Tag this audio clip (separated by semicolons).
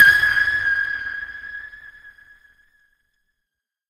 250; asdic; atm; audio; ball; game; manipulated; media; melodic; melodyne; microphone; millennia; note; notes; percussive; ping; pong; preamp; processed; sample; scale; sonar; sport; table; technica; tennis; tuned